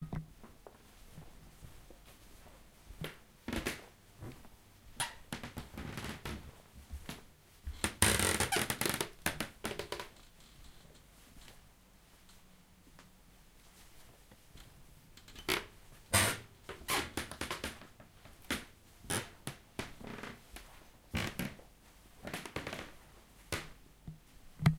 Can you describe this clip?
walking fast on squeaky floor
Walking fast on creaky floor
creak, creaky, feet, floor, foot, footsteps, hardwood, squeak, squeaking, squeaky, steps, walking, wood